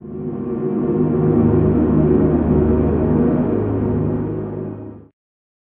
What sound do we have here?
Pad from deep space. Sounds like a very dramatic drone.
Very large sound.
You can improve the "unnatural" release of this sample by using a reverb.
3 transpositions available.